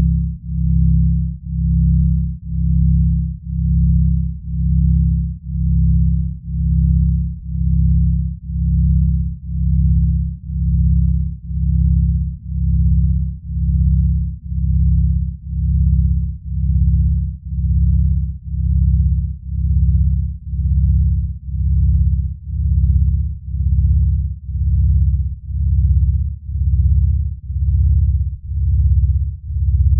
Long multisamples of a sine wave synthesized organ with some rich overtones, great singly or in chords for rich digital organ sounds.